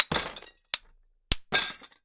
Recorded by myself and students at California State University, Chico for an electro-acoustic composition project of mine. Apogee Duet + Sennheiser K6 (shotgun capsule).